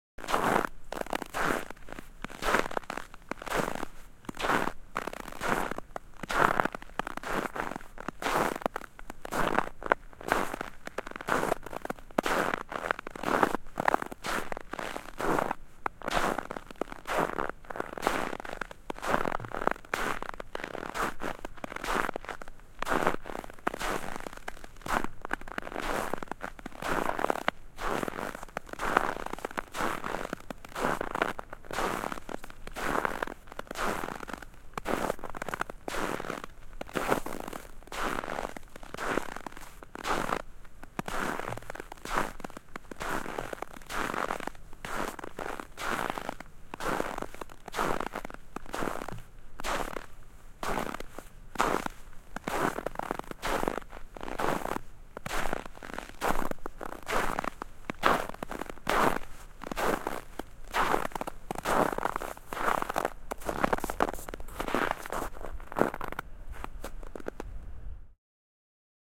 Askeleet lumessa, tie, kävely / A man walking slowly on a snow covered road, snow crunching beneath the feet in the cold
Mies kävelee hitaasti lumisella tiellä, lumi narskuu.
Paikka/Place: Suomi / Finland / Vihti, Jokikunta
Aika/Date: 14.02.1991